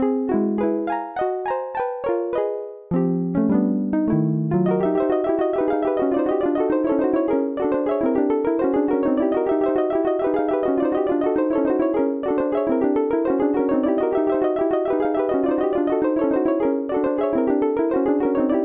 Jeeves and Wooster minstrel scene (remake)
This is a remake of the music heard in the minstrels scene in "The Mysterious Stranger", season 2 episode 5 of the 90s TV show "Jeeves and Wooster".